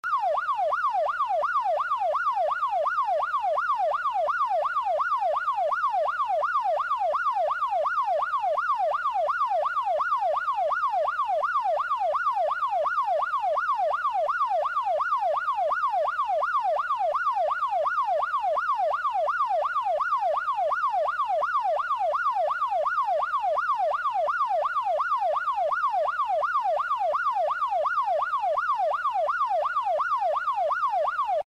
A looping siren sound for any use.
loop, sfx, siren